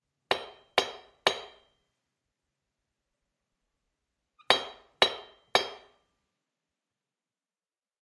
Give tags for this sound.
antique door-knocker field-recording

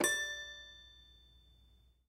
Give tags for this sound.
Carnival sounds Piano packs Circus Toy toy-piano

